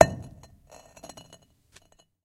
stone on stone impact2
stone falls / beaten on stone
strike, stone, impact